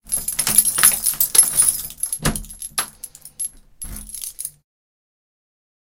Keys rattling in locking or unlocking door.
close, closing, door, foley, jingling, key, keys, lock, locking, open, opening, unlock, unlocking